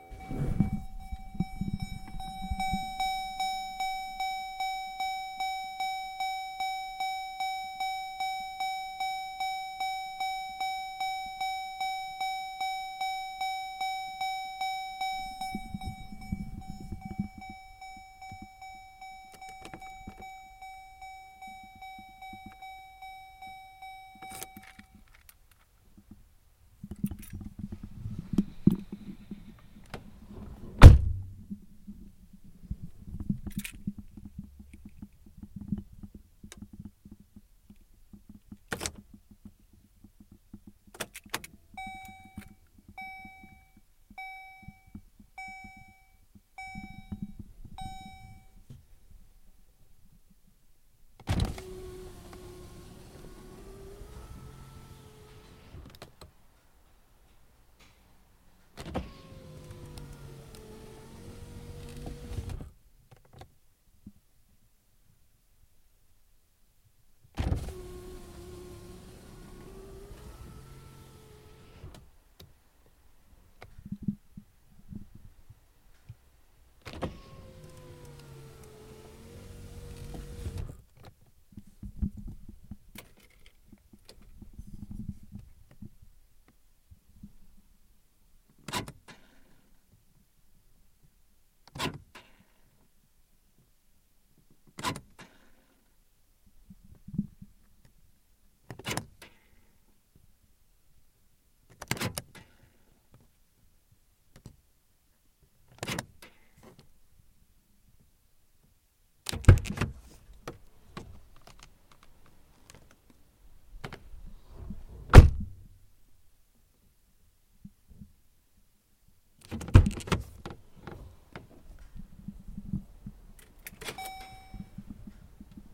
Various Int. Car Noises
Car noises. Dings, windows, door open close
door, close, window, open, car, dink